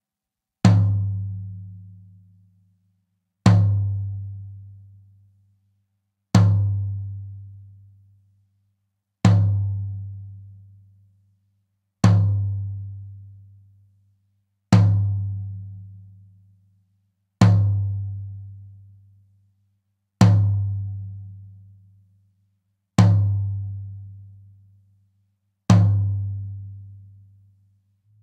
Ganon Mid Tom Drum
Mid tom drum hit with ringing EQ'd out